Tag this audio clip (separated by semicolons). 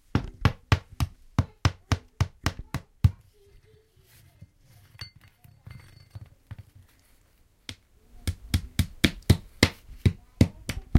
sonicsnaps; rennes; france; lapoterie